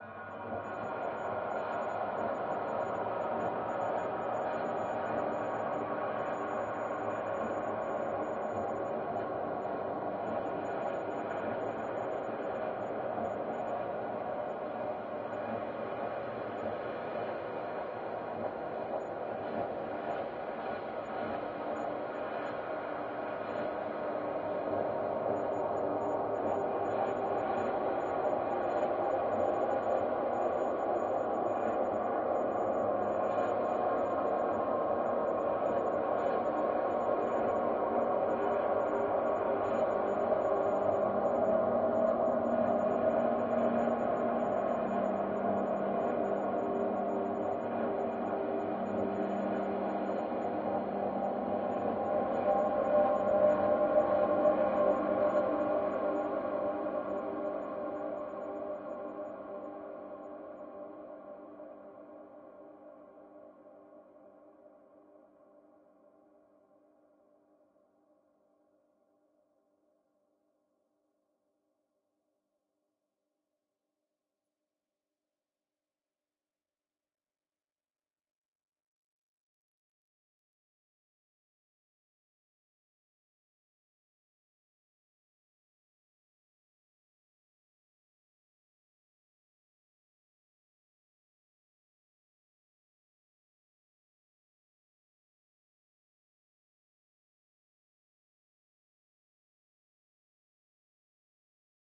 BZH Dub Trail
Ambient sounds created from sampled and processed extended trumpet techniques. Blowing, valve noise, tapping etc. materials from a larger work called "Break Zero Hue"
atmosphe, ambient